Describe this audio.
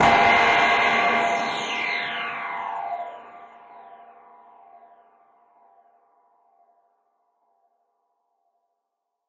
synth effect hit 1
cymbal, electronic, hit